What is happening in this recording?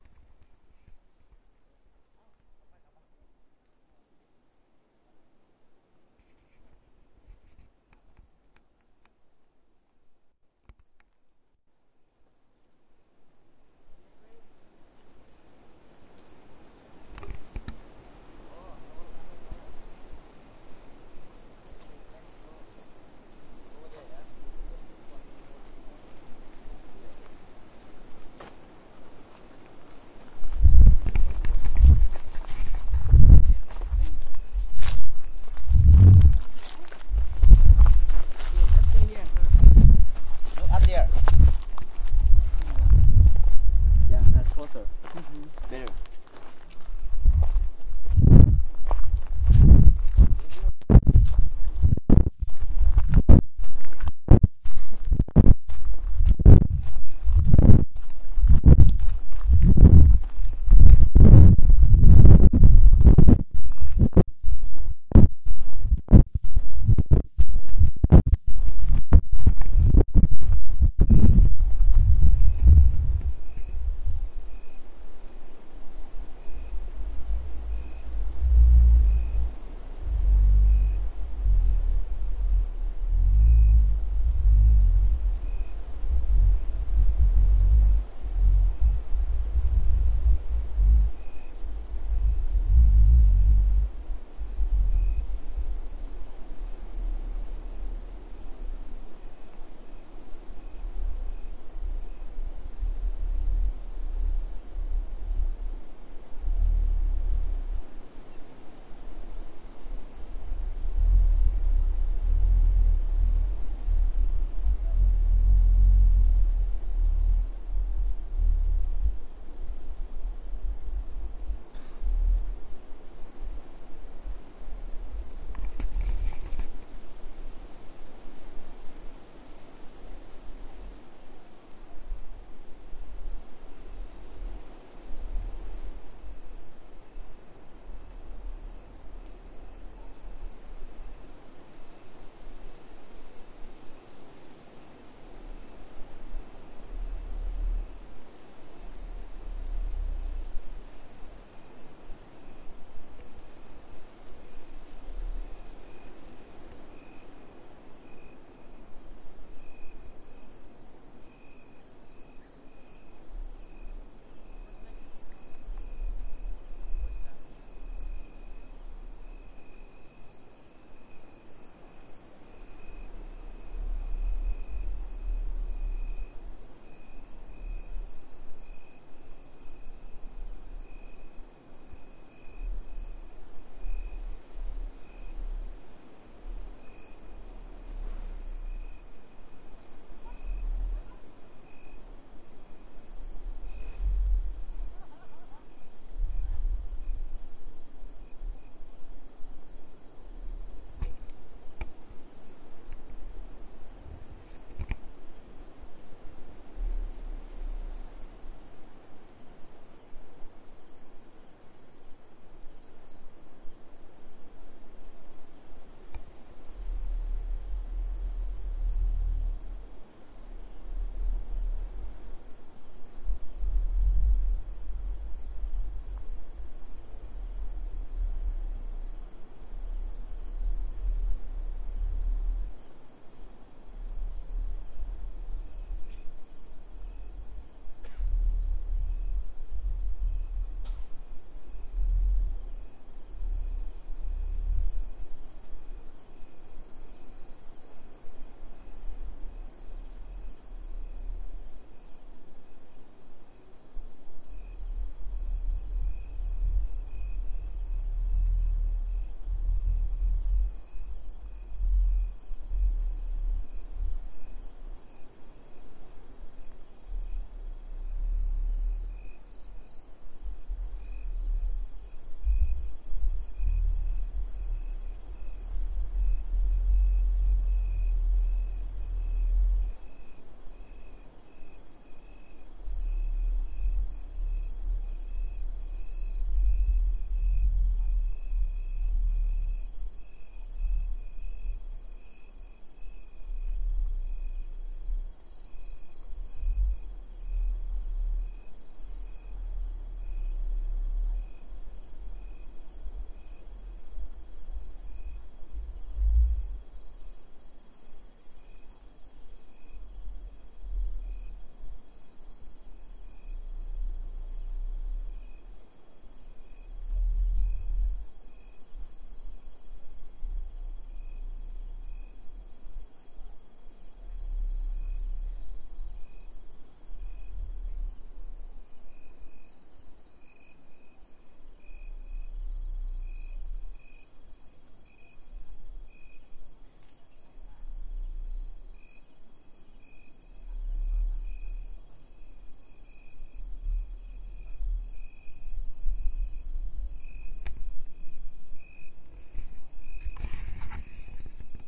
Bats outside Pak Chong, Thailand
Millions of bats flying out of a cave at sunset.
Recorded the 19/11/2013, at 7:05 pm.